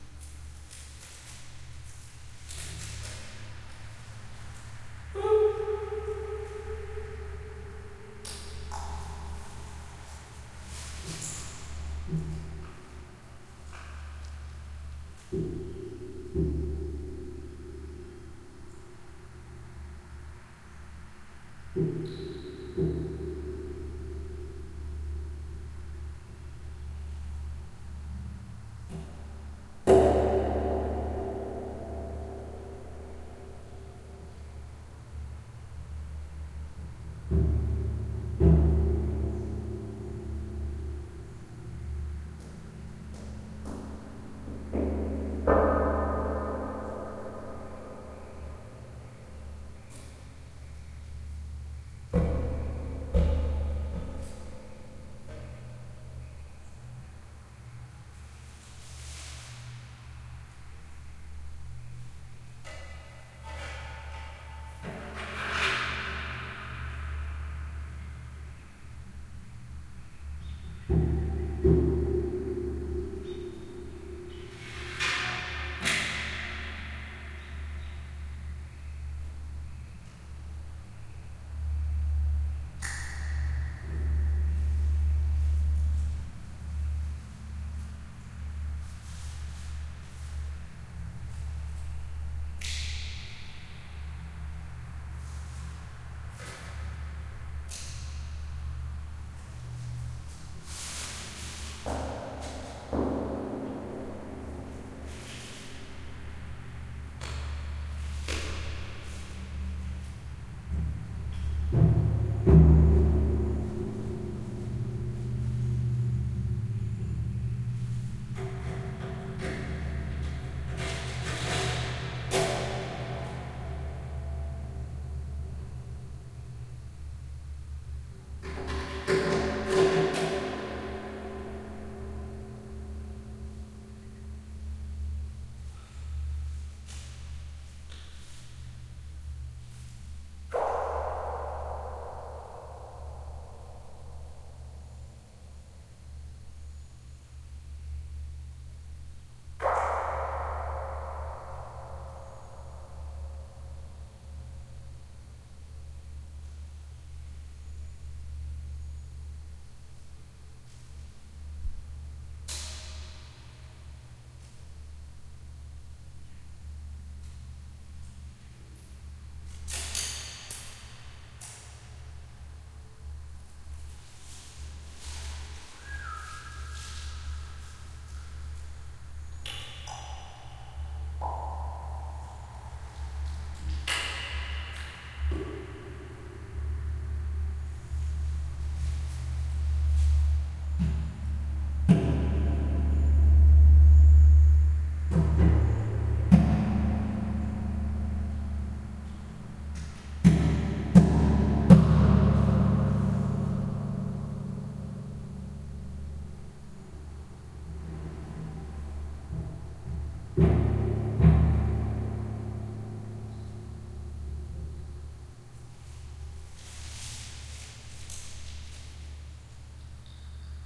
inside the well
stereo recording of dual mics lowered 10 meters into late 19th century water filled stone well next to abandoned mansion in Winchester, Kentucky. only processing : normalization. mic used : cheap MM-BSM-7 (Panasonic 61 Series) Miniature Stereo Binaural Mics with Sony minidisk recorder.